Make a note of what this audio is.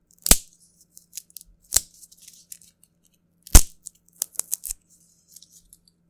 wood break small 2
Small batch of popsicle sticks being broken. Second recording.
break, breaking-sticks, breaking-wood, popsicle, snap, sticks, wood, wood-snap